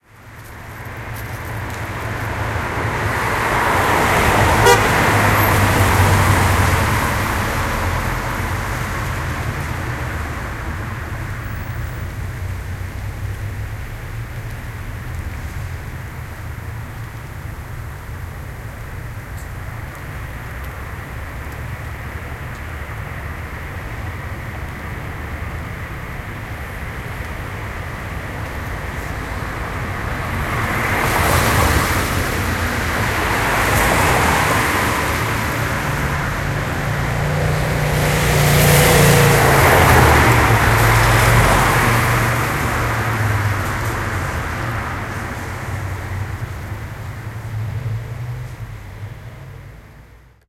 Cars ambience passing R-L on wet road
Several cars passing from right to left on a wet road.
Some horns.